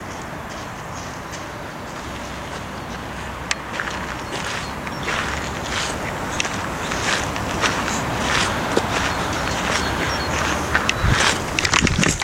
Camera Walking (Gravel)
Walking on gravel field.